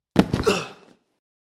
Corpo humano caindo em ambiente controlado, captado com microfone interno de gravador Zoom H1; pertencente à categoria de Sons Humanos, de acordo com a metodologia de Murray Schafer, dentro do tema de sons de combate ou luta.
Gravado para a disciplina de Captação e Edição de Áudio do curso Rádio, TV e Internet, Universidade Anhembi Morumbi. São Paulo-SP. Brasil.